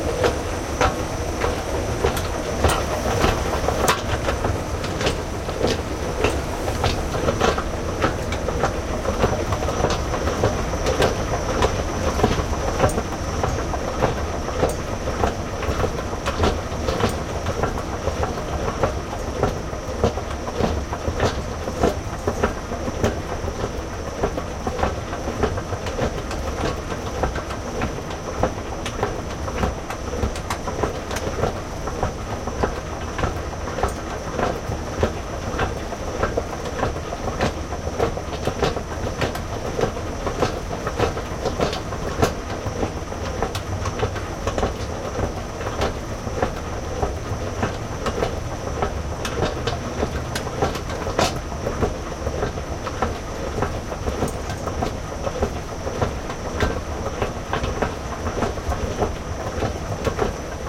moving floor escalator mechanical close3
floor, close, moving